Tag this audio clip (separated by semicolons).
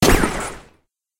weapons; sci-fi; lazer